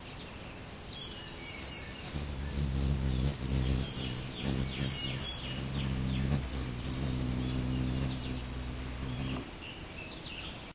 humming bird
hummingbird at the feeder